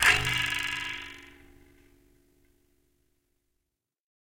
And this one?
catapult for small things
recordings of a grand piano, undergoing abuse with dry ice on the strings
abuse, dry, ice, piano, scratch, screech, torture